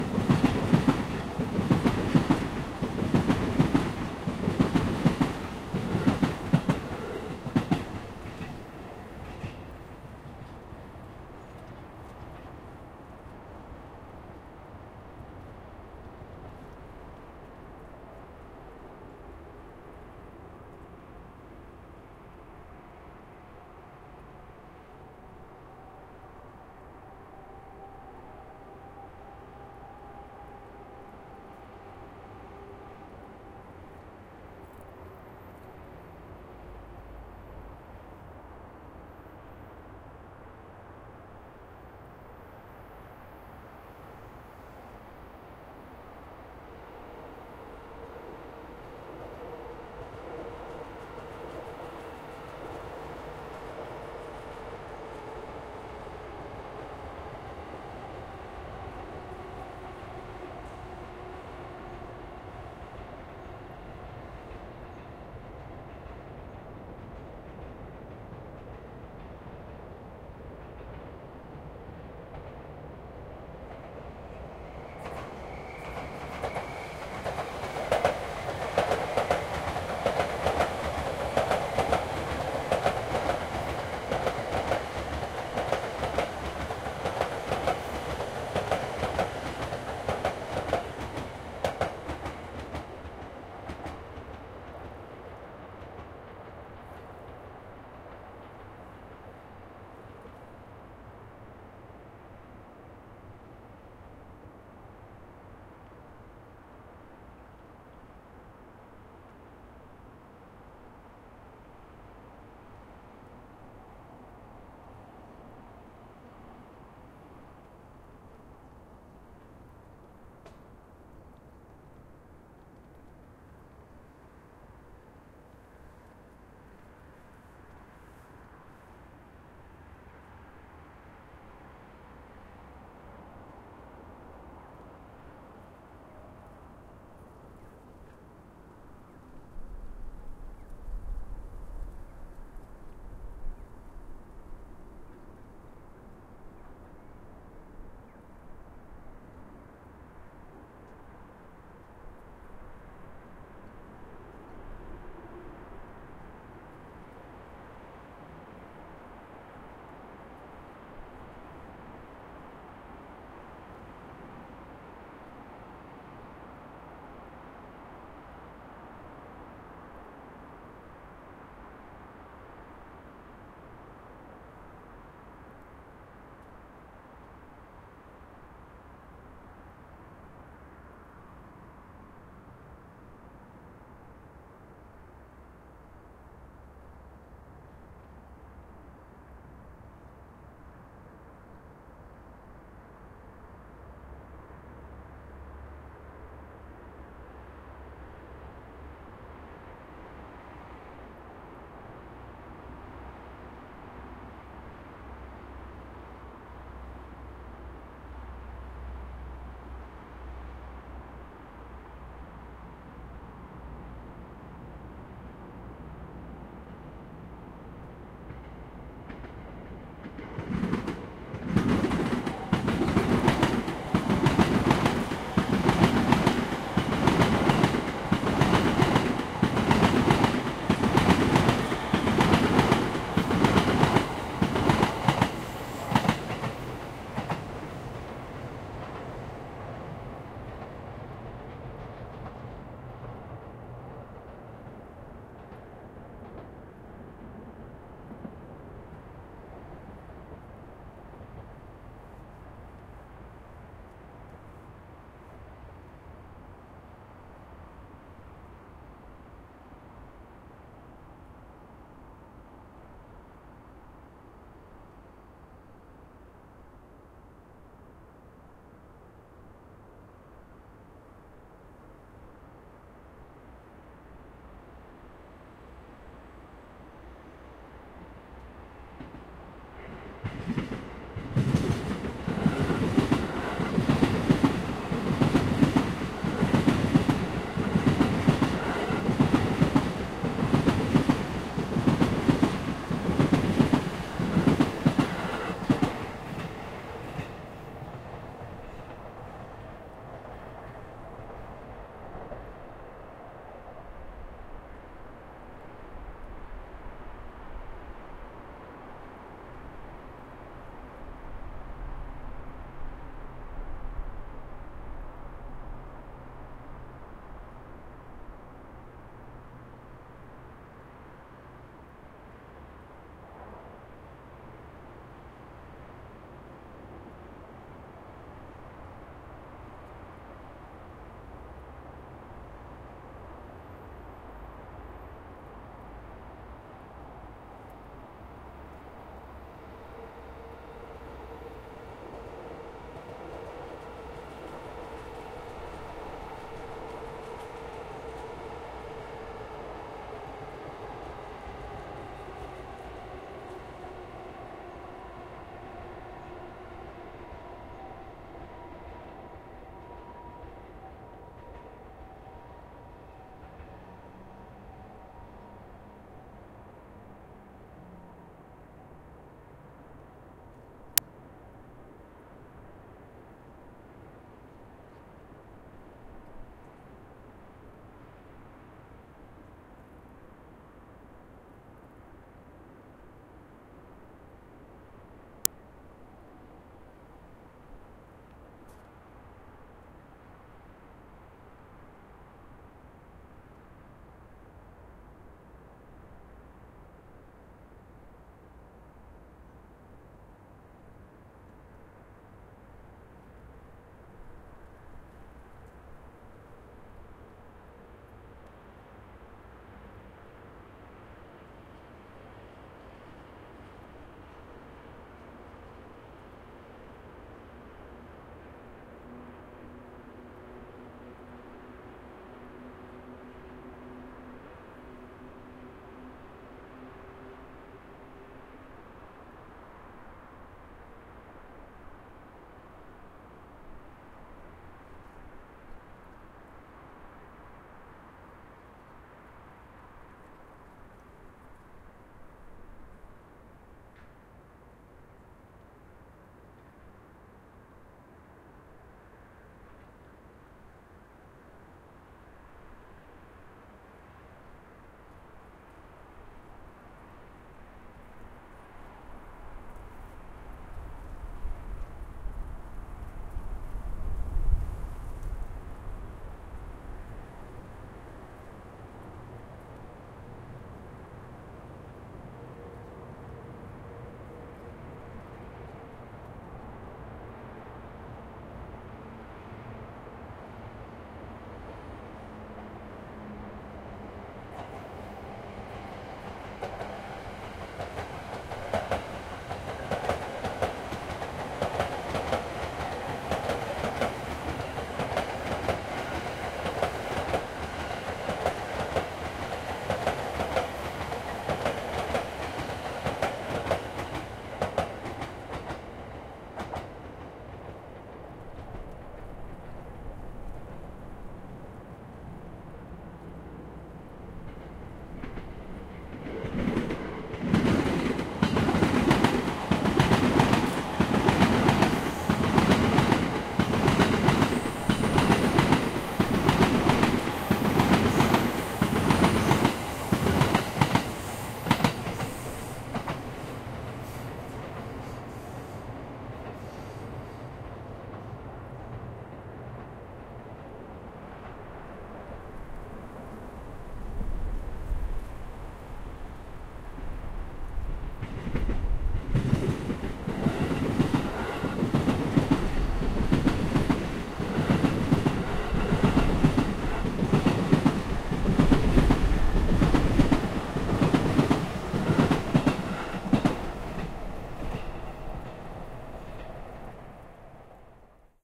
Trains of the Yamanote Line
JR Yamanote Line trains near Okachimachi station, Tokyo. Recorded December 2013.